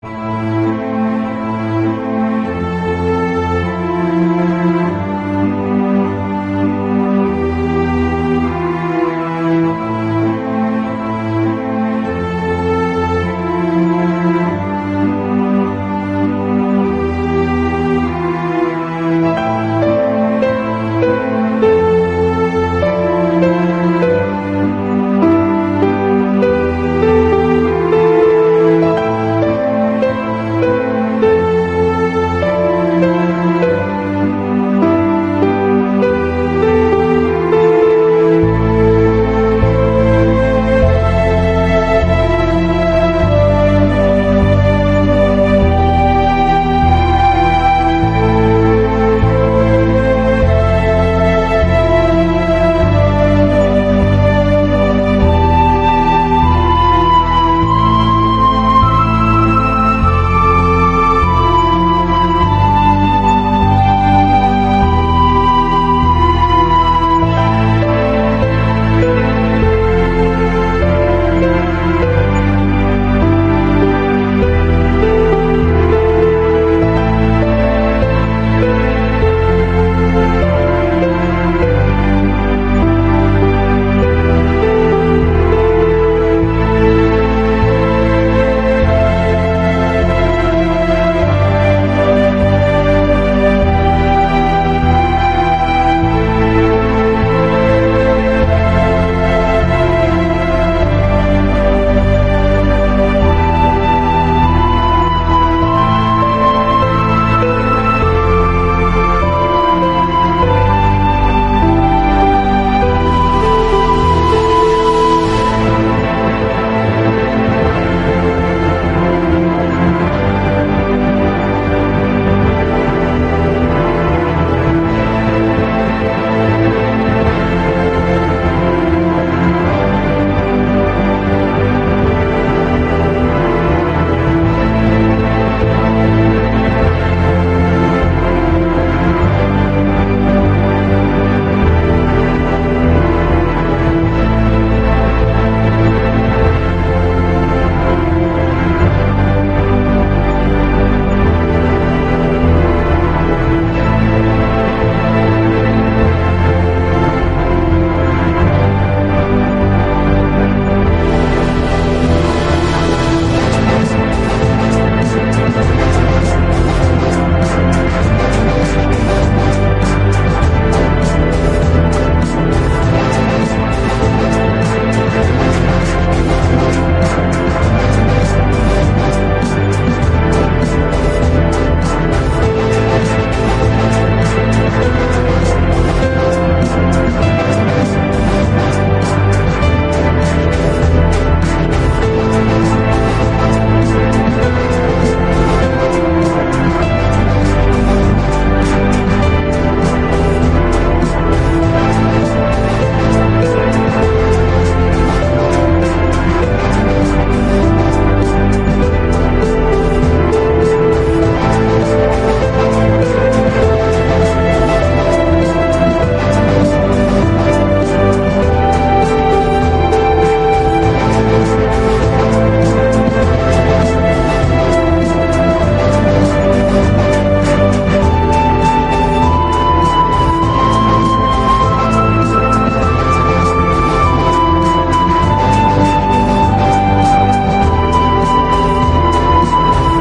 Experimental Rift (WIP)
experimental, classical